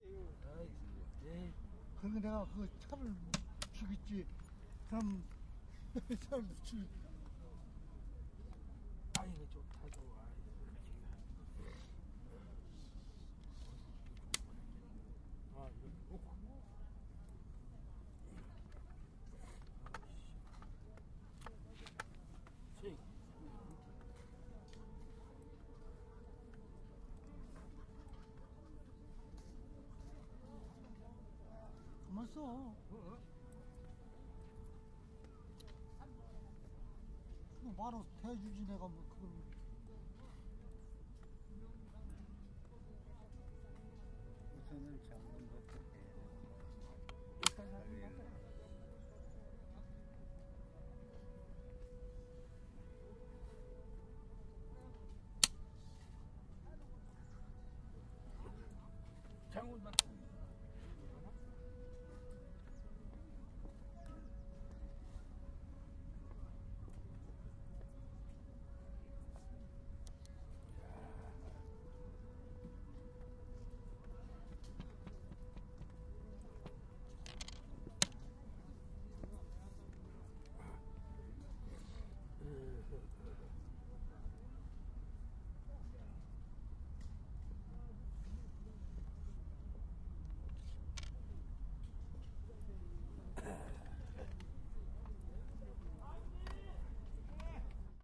0090 Janggi game
People playing the game janggi. Sounds of the counters. Music in the background
20120118
counter, field-recording, game, korea, korean, music, seoul, voice